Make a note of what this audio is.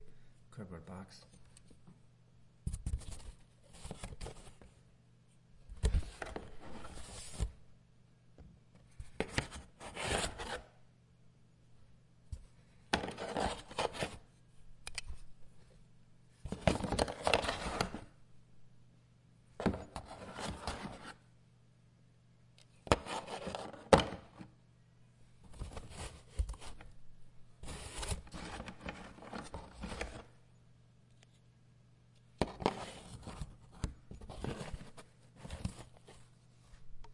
playing around with a cardboard box. some scraping sounds, some tapping sounds. Foley.